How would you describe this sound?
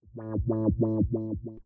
used as a funky revive sound in our game